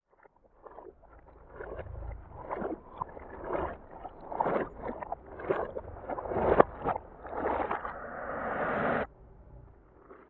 Water Bottle Shaking in Slow Motion and Reversed
Short audio clip mimicking swift movement underwater; swimming while submerged or swimming towards surface. The clip's volume increases and becomes louder as it reaches the end. This sound was created by recording the shaking of a half empty water bottle, then slowing down the shaking and putting it in reverse.